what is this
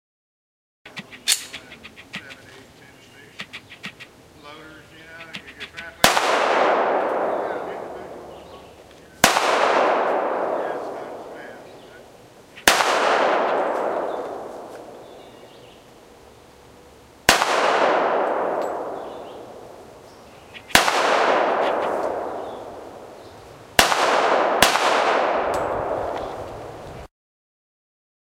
M1911 Outdoor Echo
Colt M1911 .45 caliber pistol, fired outside. Recorded on a Cannon T4i. Some camera lens noise, some voices from a distance.
shot, outside, shooting, echo, pistol, weapon, outdoors, colt, handgun, gun